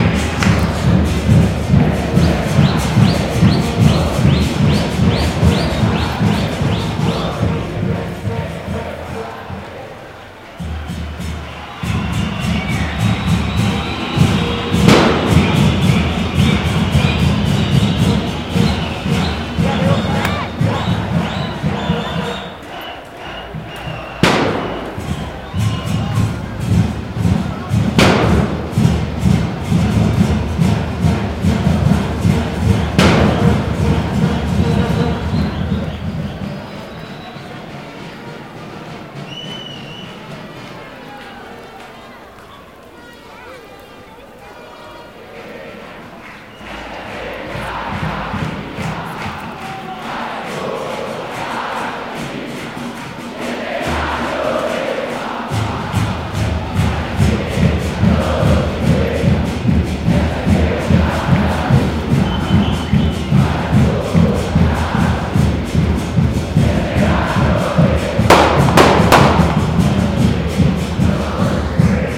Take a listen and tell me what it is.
Explosions, football's chants in street celebration (in the Boca Juniors fan national day) (12-12-2012)
Street celebration for the Boca Juniors fans' national day (First celebration in December 12, 2012), in the center of Mendoza, Argentina. Explosions, football's chants, etc.
Festejo callejero por en día nacional del hincha de Boca Juniors (primera celebración 12-12-2012), en el centro de Mendoza, Argentina. Explosiones, cánticos de fútbol, etc.
soundscape, explosions, fuegos, ambient, cars, people, Boca, traffic, artificiales, horns, futbol, city, fireworks, crowd, soccer, field-recording, Celebration, street, ambience, noise